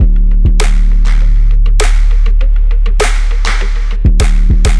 Distorto Drums 01